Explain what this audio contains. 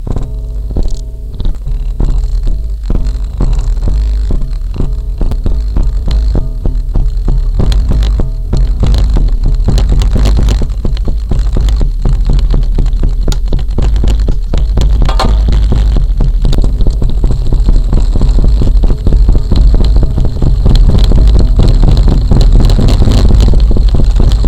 How do you record the sound of an engine big as a house. It's a lot of other sounds, like diesel generators, so the best I could do was to hold the microphone close to the enormous combustion system.You hear how the diesel engine is started with emptying a whole compressed air tank into the first strokes, then 1/4 of full speed, followed by 1/2 speed and finally economy speed, which gives the huge ship 17 knots. The propeller rotates 80 rpm. Sounds To little? In emergency situation our engine can increase to as much as 110 rpm. The engine is a 10 cylinder, long stroke, 40,000 HP Insley.
Anyone who has been working with such monster engines may have some thoughts. I would like to record the sound of the diesel engine for M/S Emma Maersk, 110,000 HP